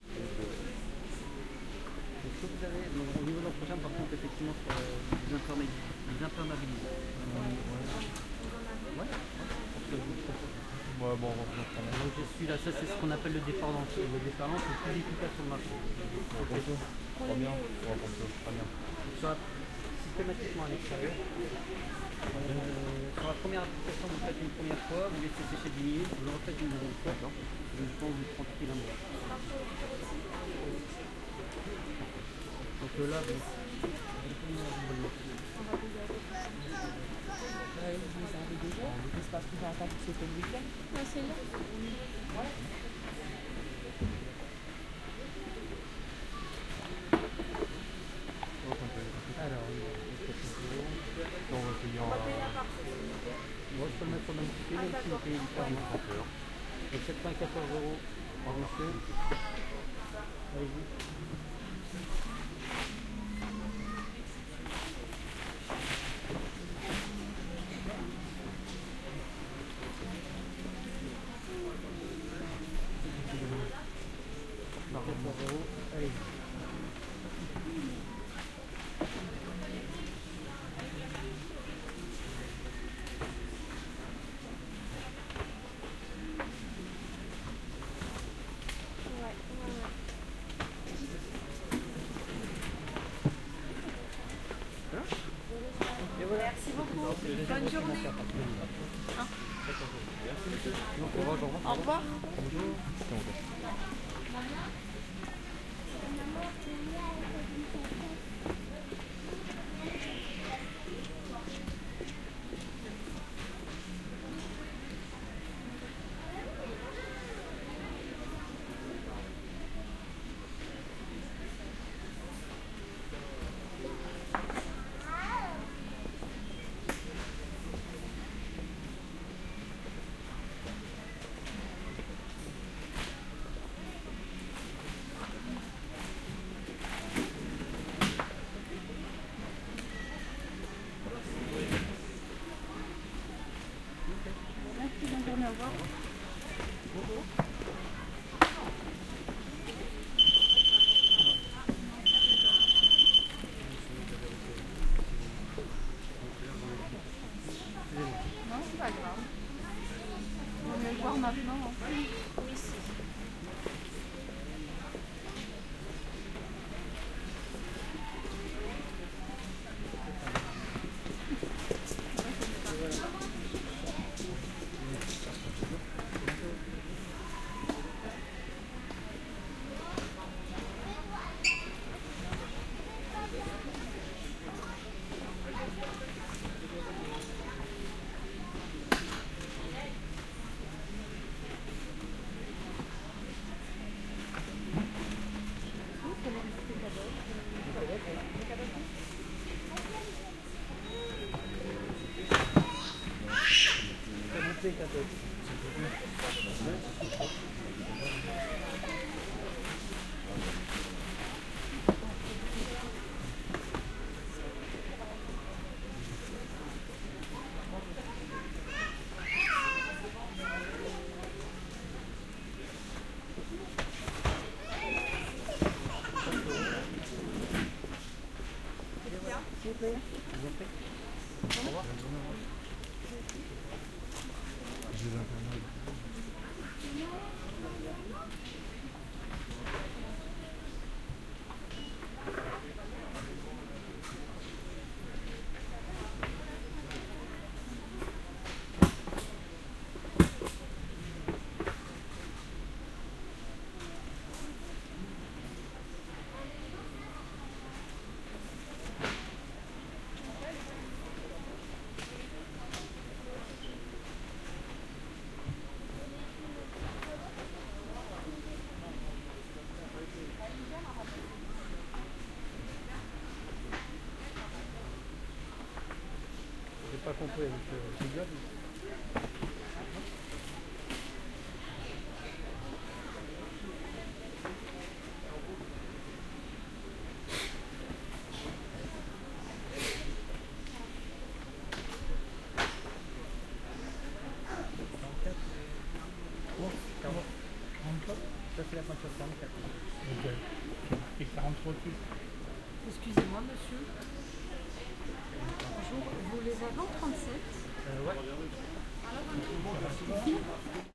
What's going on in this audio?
Shoe Store in Roubaix
A binaural french shoe store ambience. You can hear vendors and customers talking, baby, children, etc.
Recorded with Hooke Verse, binaural bluetooth buds.
Use my files wherever you want and however you want, commercial or not. However, if you want to mention me in your creations, don't hesitate. I will be very happy ! I would also be delighted to hear what you did with my recordings. Thanks !
sneakers, clothing, binaural, shoes, roubaix, selling, store, tennis, shop, shoe, 3d, french, sport